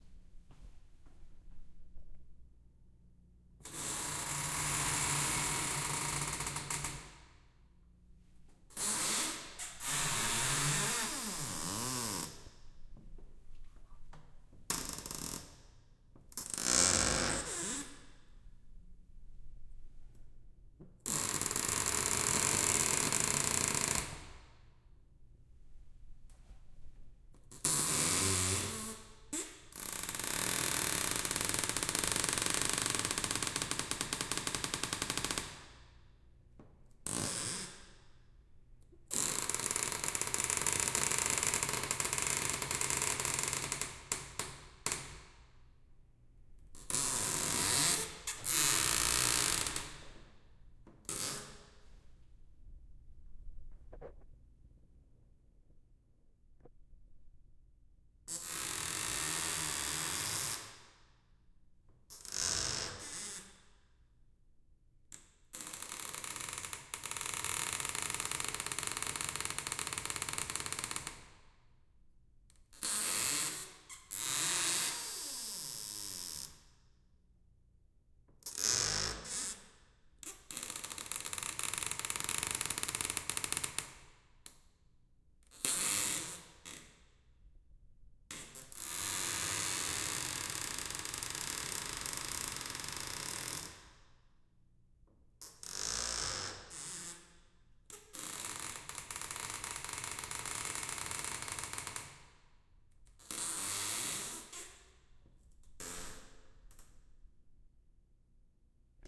Squeaky Door
One of the squeakiest metal doors I've ever encountered. Recorded in a sparse, tiled bathroom with the Zoom H6. Many different creaks and squeaks to choose from.
foley, bathroom, echo, close, reverb, door, squeak, metal, eerie, creaky, creak, haunted, tile, echoey, squeaky, stall, field-recording, open